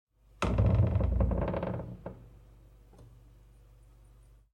Heavy Door Squeaking
creak, door, heavy, hinge, open, squeak, squeaky, wood, wooden